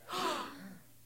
Small crowd gasping quickly
gasp, gasping, studio, audience, theatre, crowd, theater, group